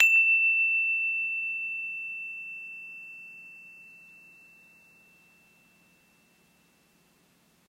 Aud Energy chime high note pure
energetic healing sound